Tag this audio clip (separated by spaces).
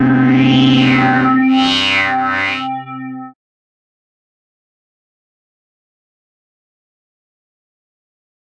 110 acid atmospheric bounce bpm club dance dark effect electro electronic glitch glitch-hop hardcore house noise pad porn-core processed rave resonance sci-fi sound synth synthesizer techno trance